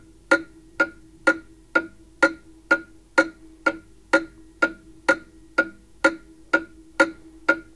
clock-ticking-02
This is an old (pre-1950) Junghans wall-mounted clock. This clip is the clock. Recorded in living room.
3, bar, chime, clock, enclosure, mechanical, ticking, tuned, wall-mounted, wooden